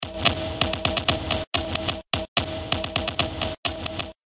sound-design created from chopping up some percussive loops in Ableton Live and Adobe Audition